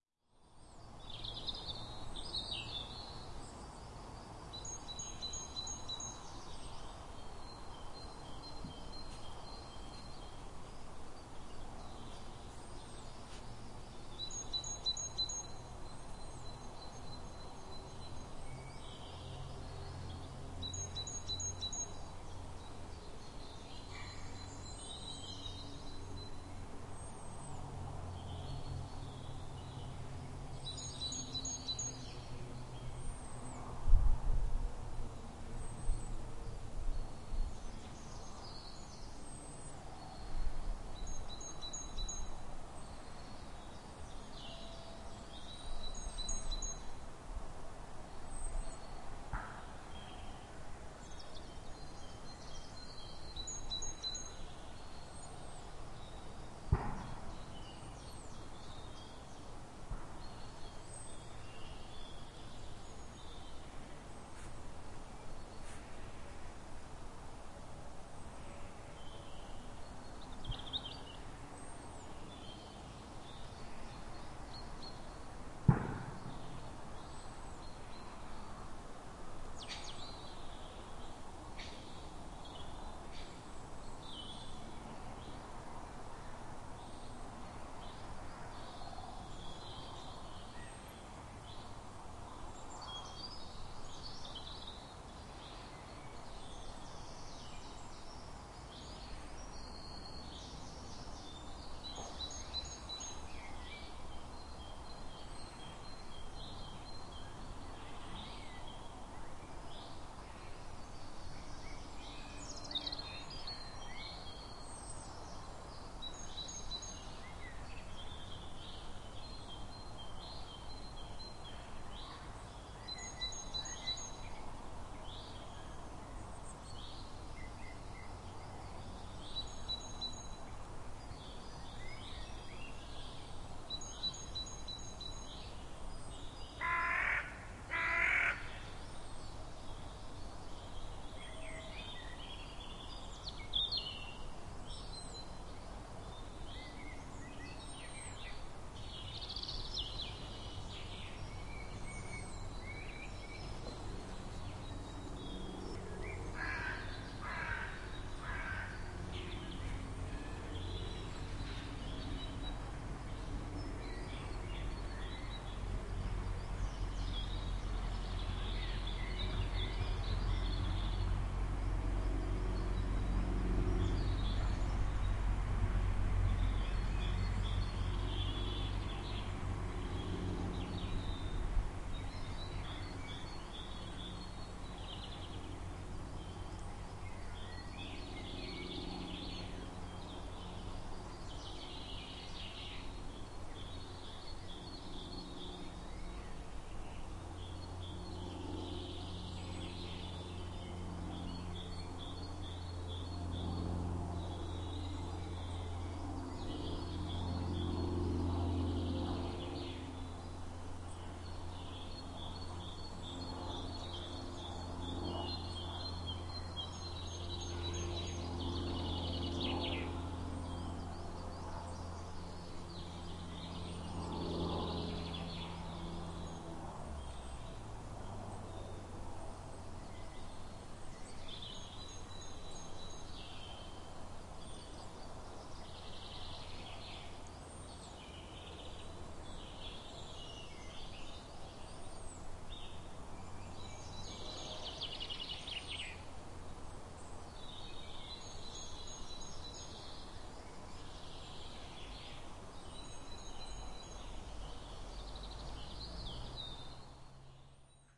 Sk310308 gun crow plane
A spring day in late March 2008 at Skipwith Common, Yorkshire, England. The sounds of many birds can be heard including Great Tit, the distant drummin of the Great Spotted Green Woodpecker. There are also general woodland sounds including a breeze in the trees, guns, an aeroplane, and distant traffic.
ambience, bird, crow, field-recording, woodland